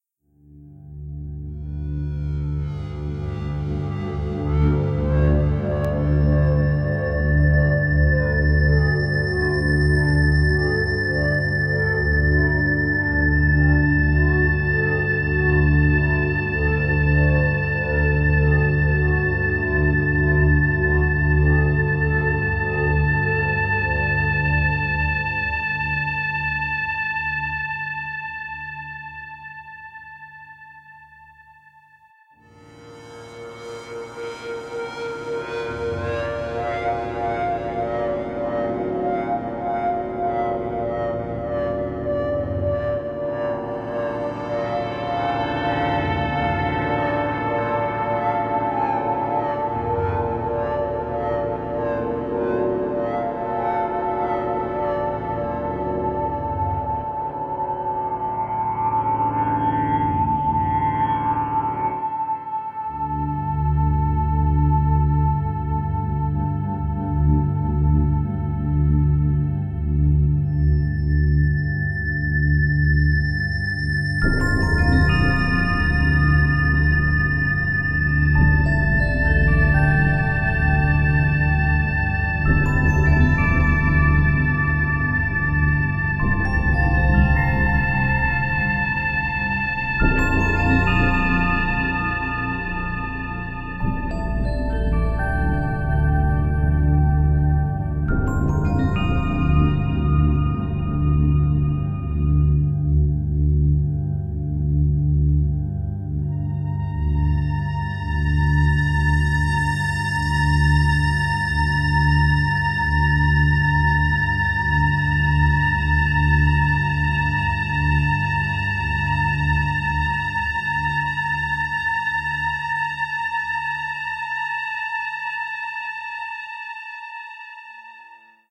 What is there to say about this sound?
SOUND NOISE SCAPE / DRONE created with 30 sec fragments of The NANO studio SYNTH in a specific chord line , endless random played in SOUNDSCAPE creator 1.3
1, CREATOR, DRONE, NANOSTUDIO, NOISESCAPE